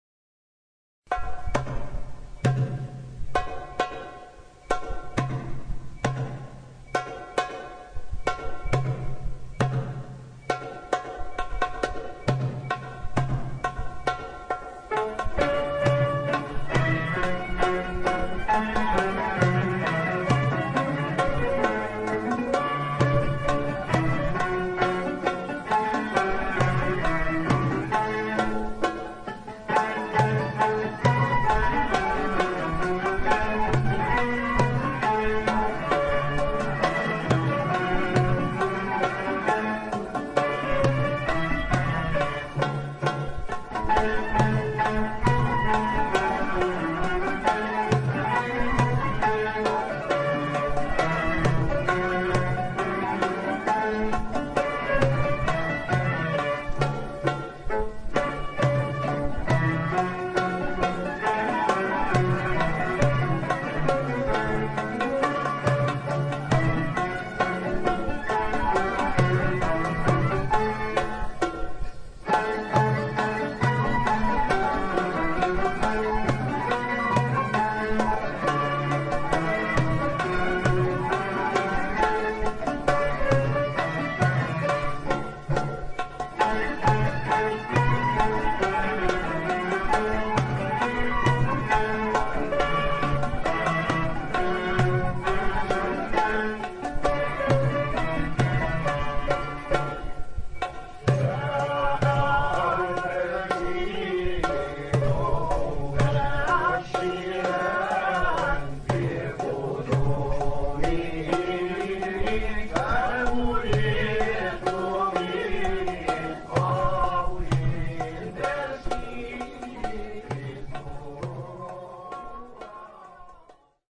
Darj Rhythm+San'a
Darj rhythm with ornaments, applied to a Tawshíya of the mizan Darj of nawba Istihlál